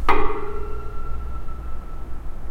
Prison Locks and Doors 9 Prison bar knock
From a set of sounds I recorded at the abandoned derelict Shoreditch Police Station in London.
Recorded with a Zoom H1
Recorded in Summer 2011 by Robert Thomas
locks, scrape, Prison, squeal, latch, Shoreditch, lock, Station, London, Police, doors